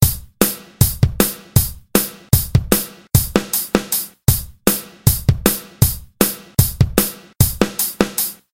11-8 beat b ext
A drum pattern in 11/8 time. Decided to make an entire pack up.
08, 11-08, 11-8, 8, full, kit, pattern, real, sounds